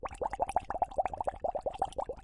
Foley effect with the purpose of simulating bubbles
Bubbles, Effect, Foley